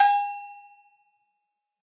Agogo Bell Low Velocity15
This pack is a set of samples of a pair of low and high and pitched latin Agogo bell auxilliary percussion instruments. Each bell has been sampled in 20 different volumes progressing from soft to loud. Enjoy!